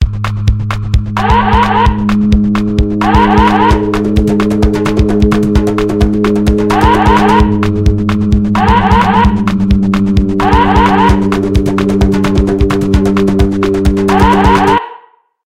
finger song
song based on sampled sirens and rhythm samples. As heard on 'Finger Broadway'
freaky, strange, weird